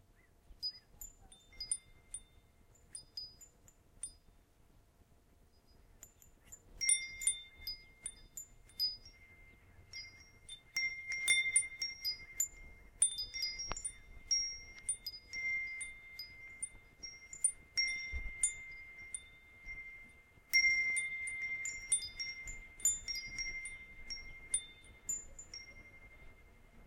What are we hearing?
A wind chime in the wind.